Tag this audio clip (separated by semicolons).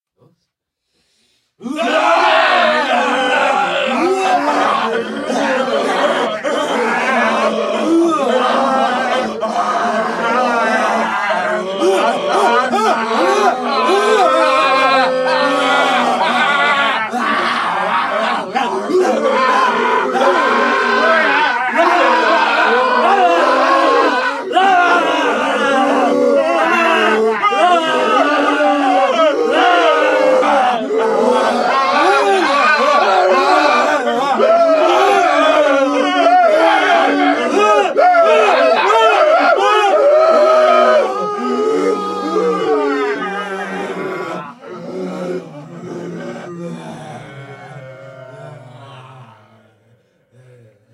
terror; scary; zombies; creepy; ghost; terrifying; horror; weird